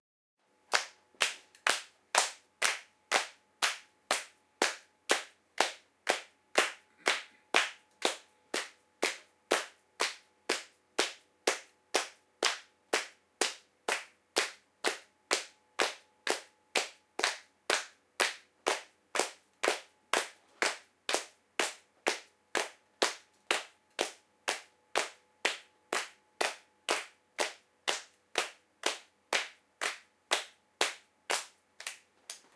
clapping along with music
A small group of people trying to clap along with the music. File with only the claps, no music.